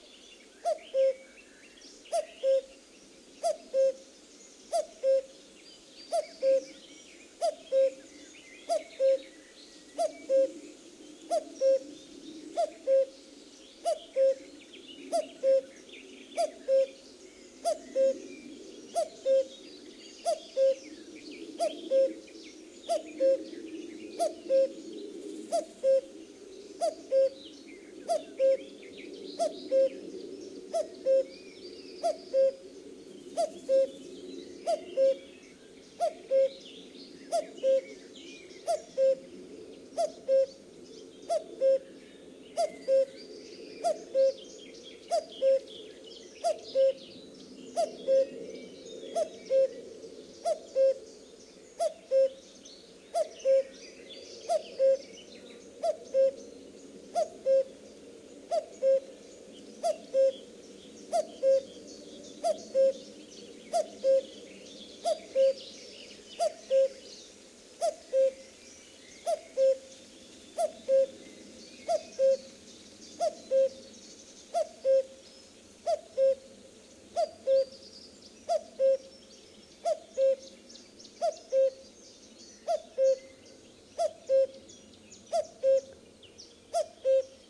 cuckoo close 2007 05 23
Very close common cuckoo (bird of the Year 2008 in Germany) on a may afternoon in a natural wetland reserve north of Cologne. I attracted him by reproducing its call blowing into my hands well hidden under a dense bush. Notice that the first part of the call sounds a bit hoarse when the bird is close. Vivanco EM35, preamp into Marantz PMD671. Low frequencies filtered.
nature, bird, forest, spring, field-recording